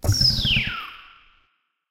A sound I made for one of my games, that was used as a time travel sound effect.
If you use it, I'd be happy to know about it.